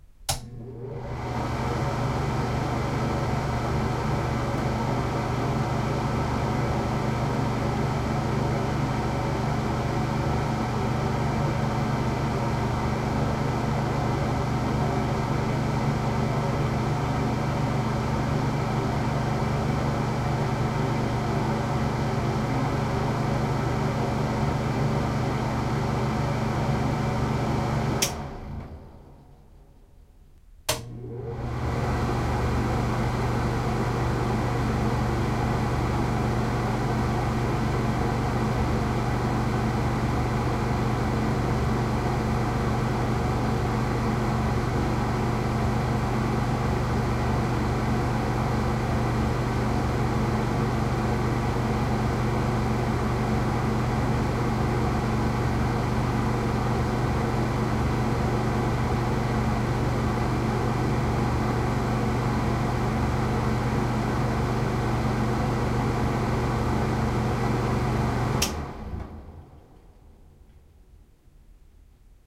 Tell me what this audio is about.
wall fan small switch on off ventilaton motor
switch, off, small, wall, ventilaton, motor, fan